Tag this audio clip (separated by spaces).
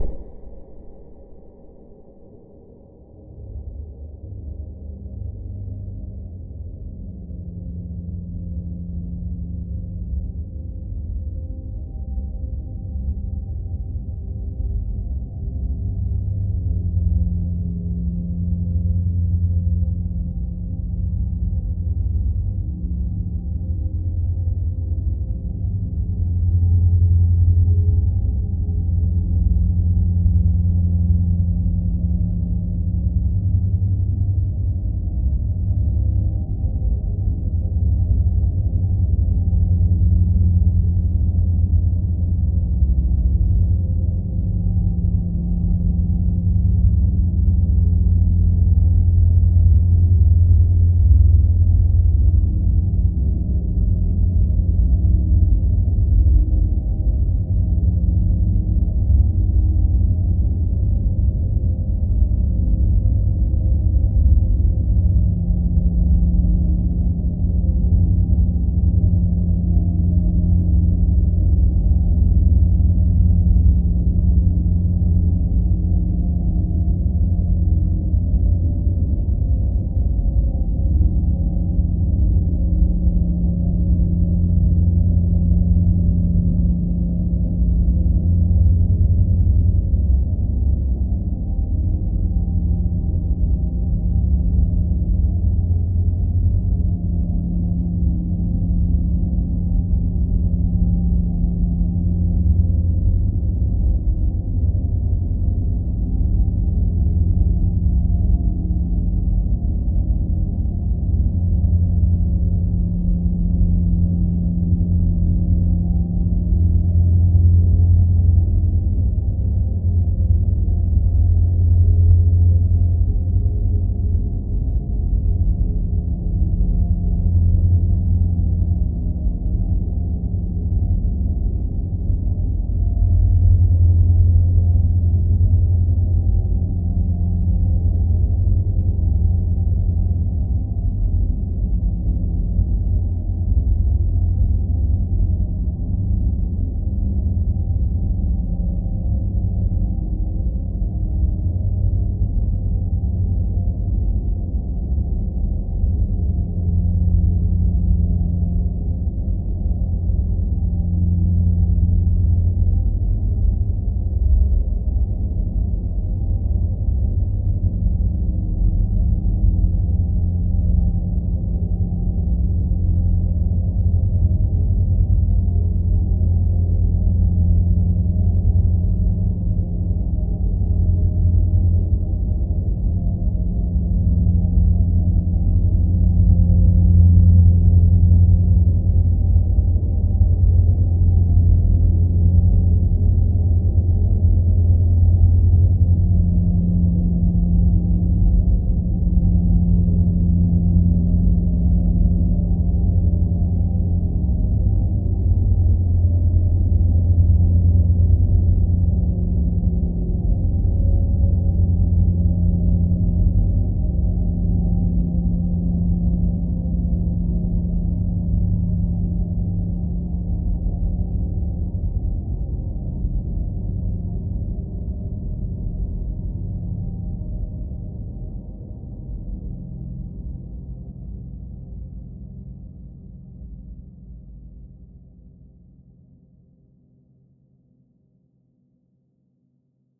artificial evolving